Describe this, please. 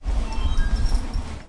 Sound of telephone receiving a call in library.
Recorded at the comunication campus of the UPF, Barcelona, Spain; in library's first floor, inside 'factoria' room.